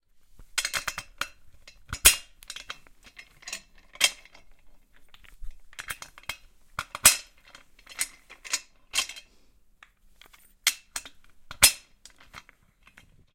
Dismantling scaffolding
building, construction, Dismantling, drilling, scaffolding, work